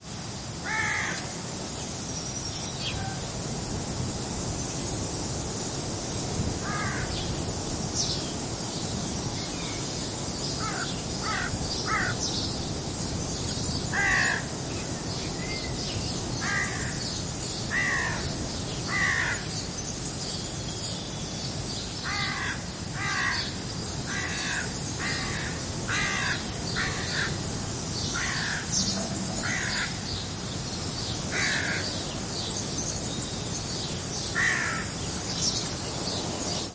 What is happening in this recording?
20210626.windy.crow.142
A crow caws repeatedly while flying, with noise from wind on trees. House Sparrow chirps also heard. Matched Stereo Pair (Clippy XLR, by FEL Communications Ltd) into Sound Devices Mixpre-3. Recorded on Revilla de Santullán (Palencia Province, N Spain)
nature, forest, summer, trees, cawing, crow, birds, field-recording, wind